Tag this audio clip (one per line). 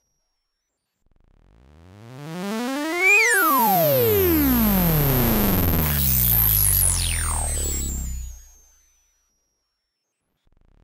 doepfer
lofi
synthetised
Modular
FX
digital